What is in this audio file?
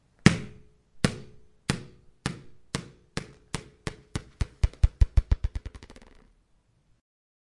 A ball falling down.Recorded with a Zoom H1.
down, ball, pong, falling